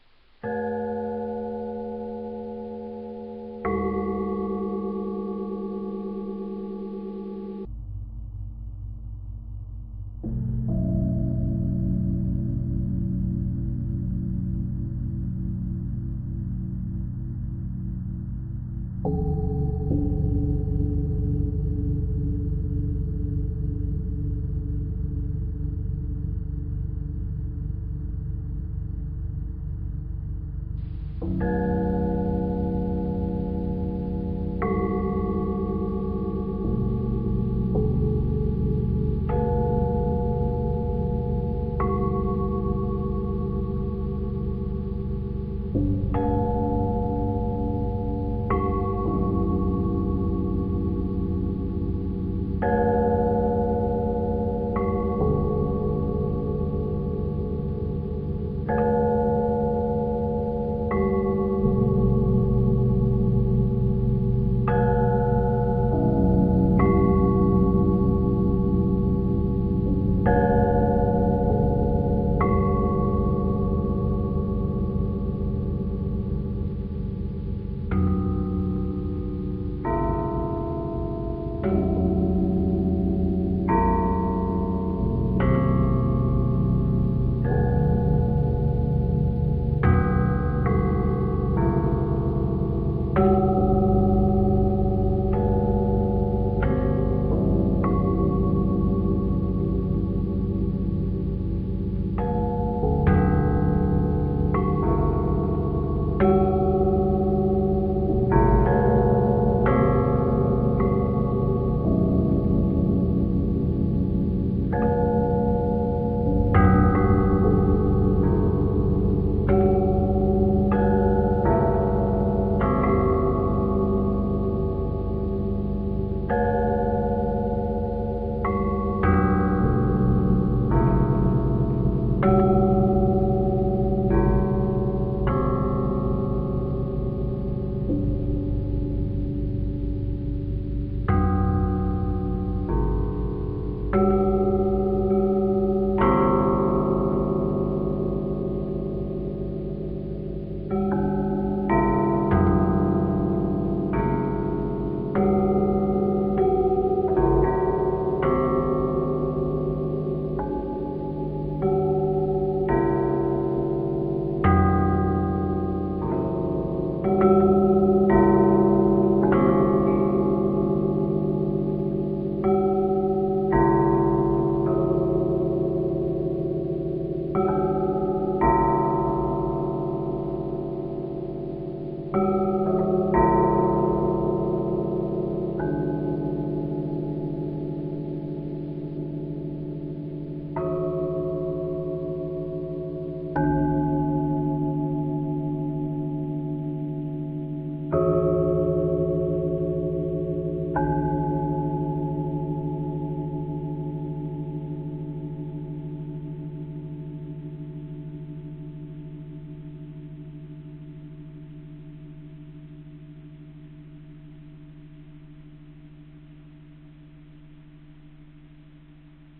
A bell system playing ???. Cleaned the noisy record with a NCH program. Not perfect, but enjoyable. I have photos on the bells somewhere.
brass, germany